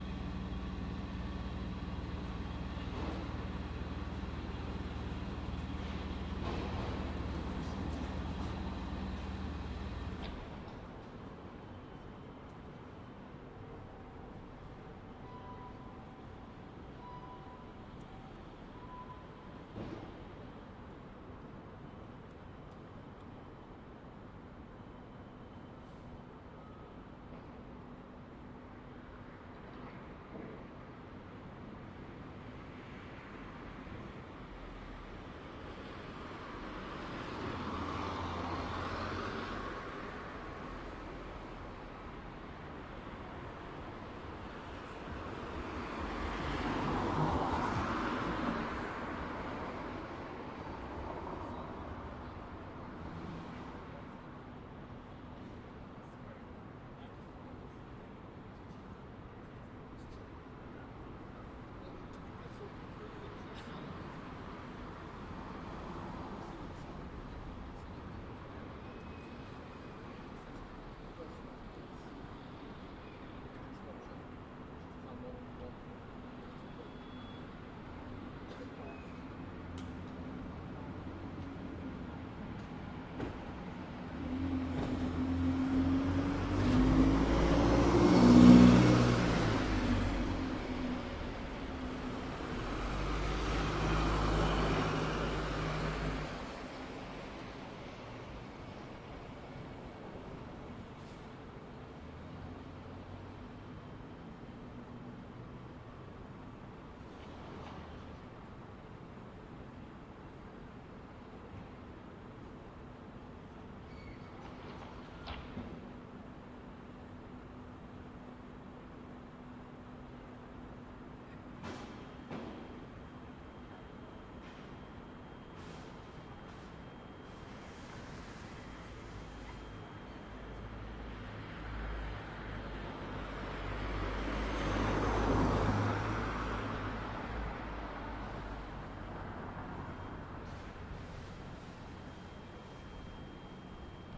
cleaning staff02
One of my late night Canary Wharf (London) recordings. I was curious how this place sounds like, mainly without people, late at night, so I returned time-to-time to record the environment ...
cleaning, wharf, canary, night, staff, street